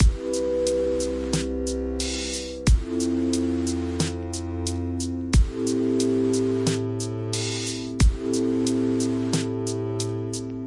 Lofi loop I ended up making in Ableton today. Might be useful for somebody.